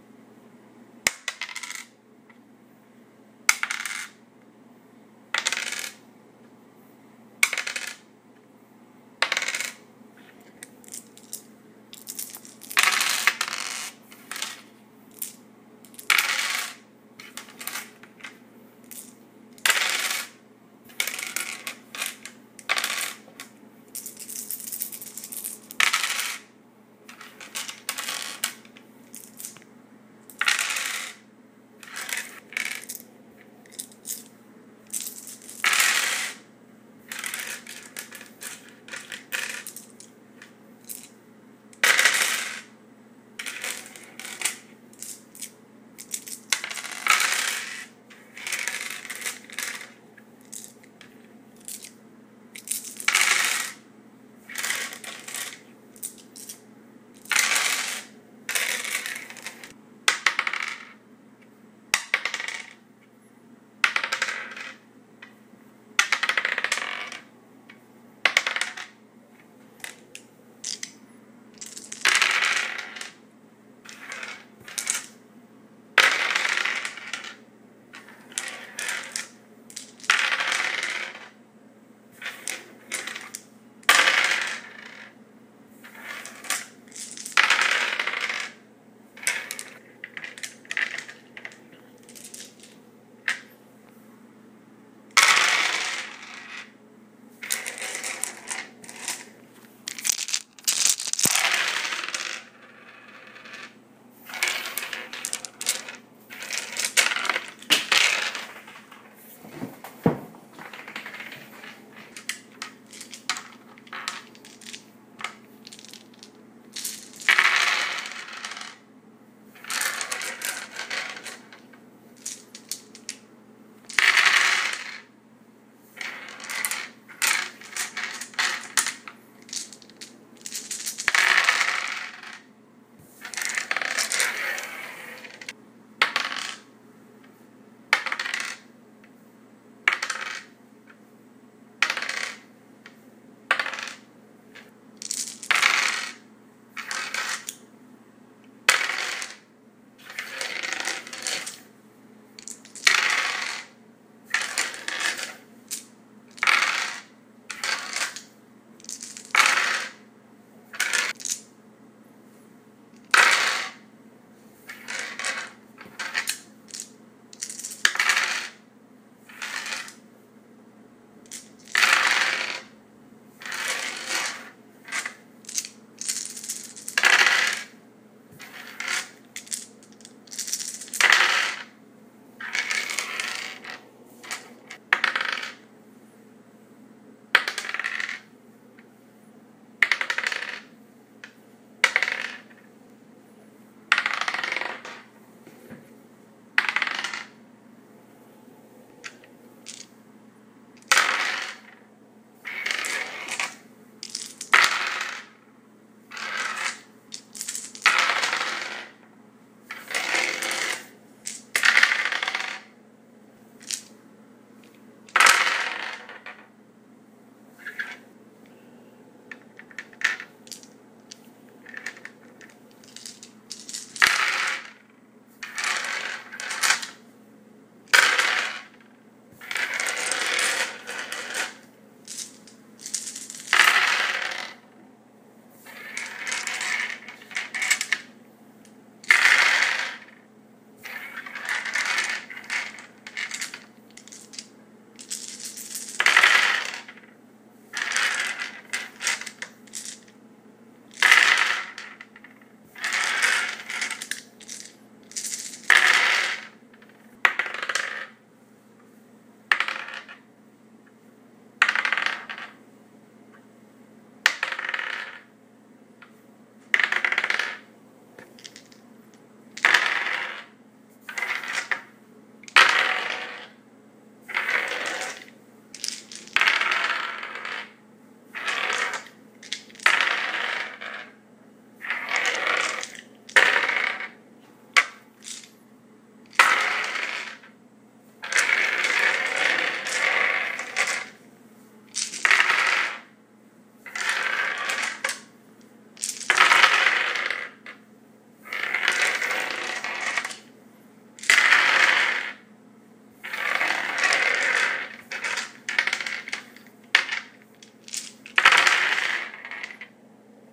DiceRollingSounds Wood
This file contains the sounds of various dice rolling on a wood surface.
Dice rolling sounds. Number of dice: 1, 5, and 10+ samples. Type of dice: d2 (coin), d4, d6, d8, d10, d12, d20, d100 (two d10's). Rolling surfaces: wood, tile, and glass.
d10, d100, d12, d20, d4, d6, dice, die, game, roll, rolling, rpg, throw, throwing